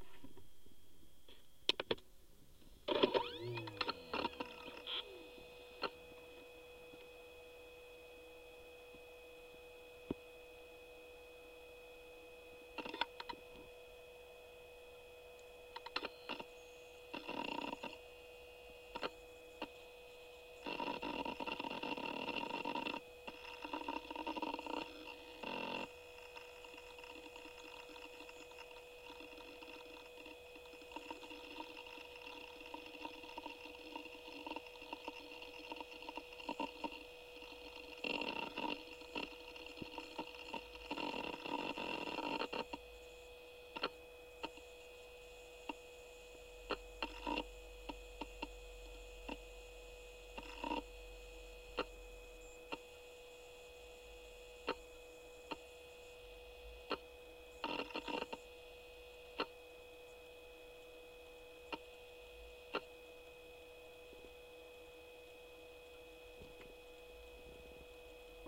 booting ibook
booting g3 ibook, cheap contact mic